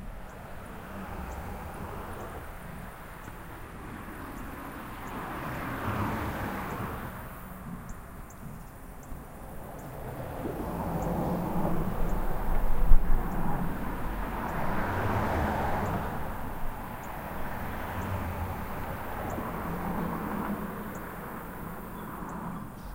Nothing more than holding the mic in my hand and pointing it up as I stand under the bridge. ground to floor was about 30 feet at my location. There was very little wind and this was recorded around dusk, dusk 15 while the air temp was in the high 80s. Humidity was between 25-30% at the time of the recording. 21 second clip
Recording chain: AT822 -->Sony hi Mini Disc Recorder.